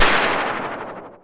Here's a heap of snapshot samples of the Synare 3, a vintage analog drum synth circa 1980. They were recorded through an Avalon U5 and mackie mixer, and are completely dry. Theres percussion and alot of synth type sounds.